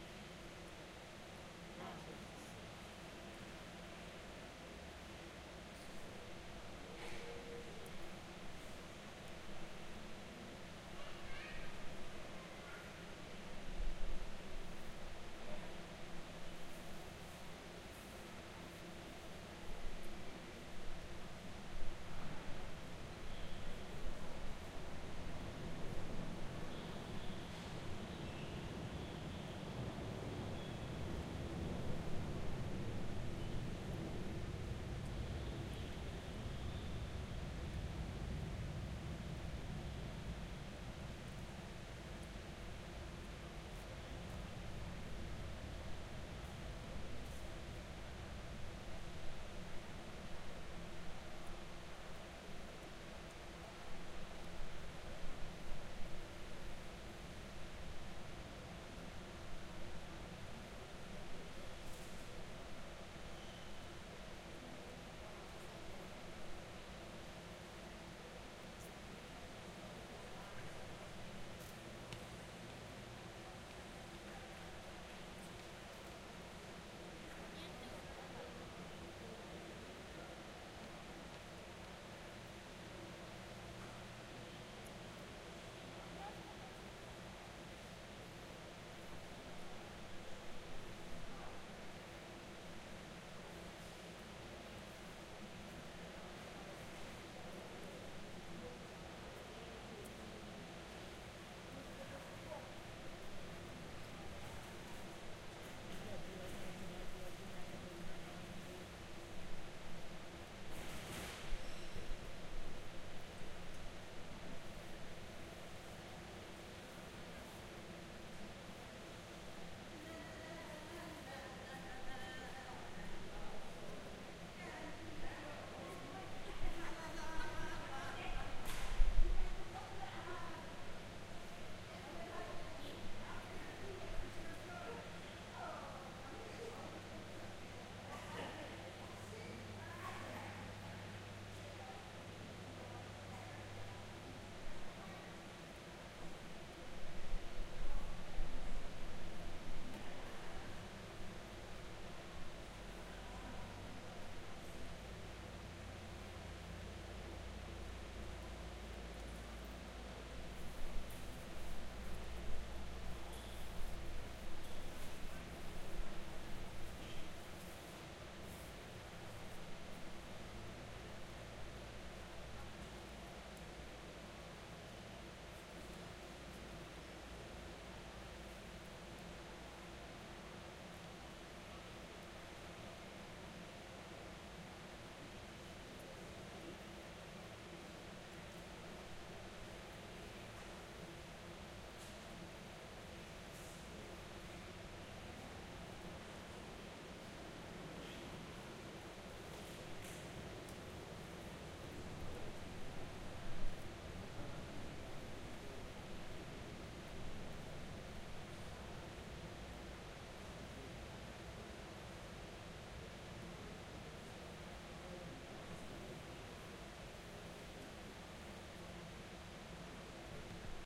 Ambience INT airport waiting hall gate 2 air conditioning (lisbon portugal)
Field Recording done with my Zoom H4n with its internal mics.
Created in 2017.